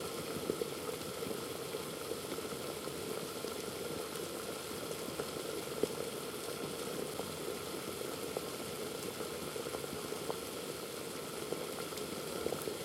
Boiling water on a stove